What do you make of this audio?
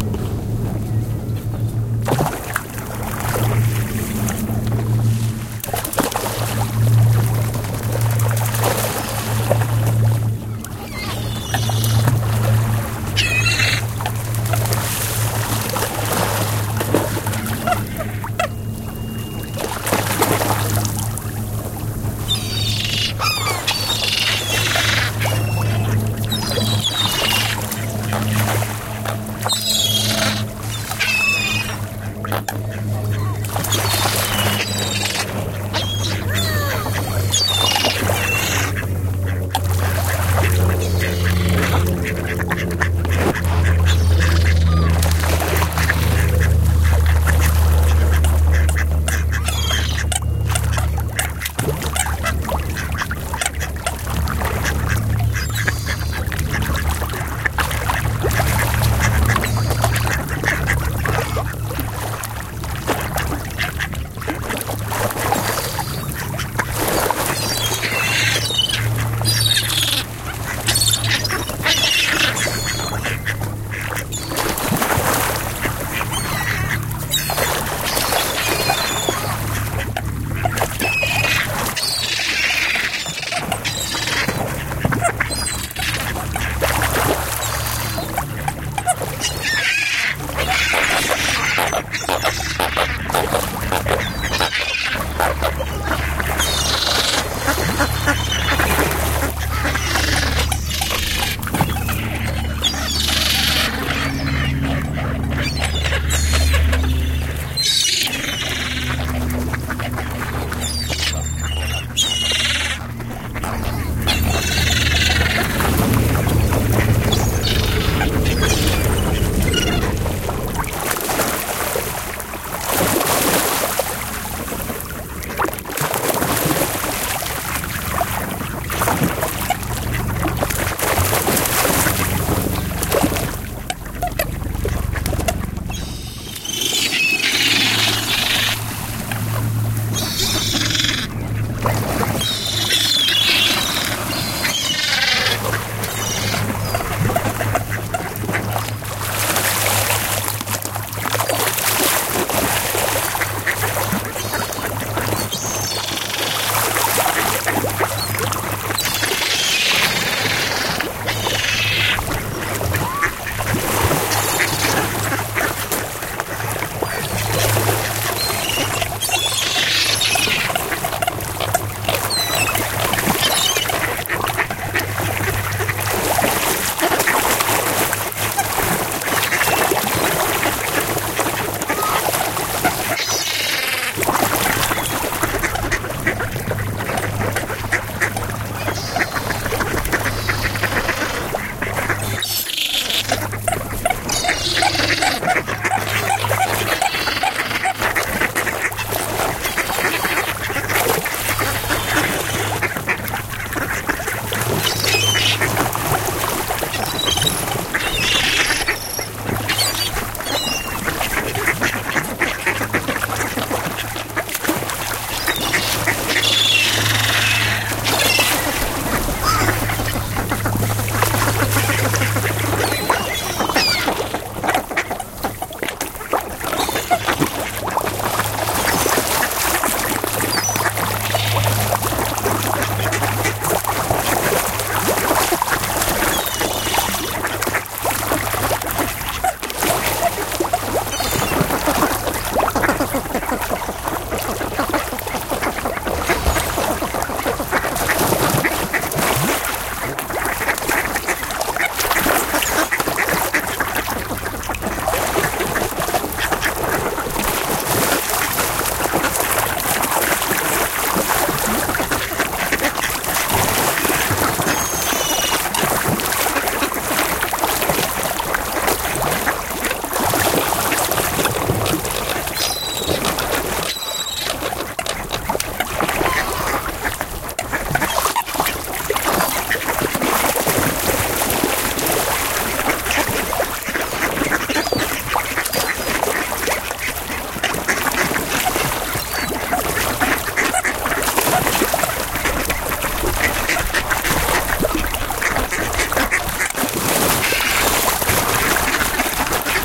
Seagulls, Ducks and Carp Fish Feeding Frenzy - Lake Mead, Nevada USA
This is the sound of seagulls, ducks and other water birds competing with gigantic carp fish, and each other, for food on Lake Mead. It starts mellow and and grows into a full-blown frenzy with lots of calling, quacking, chirping, splashing, thrashing and slurping (from the fish).
The Hoover Dam isn't far from this lake, so tourist helicopters occasionally pass by. I hope you all like it.
thrash, field-recording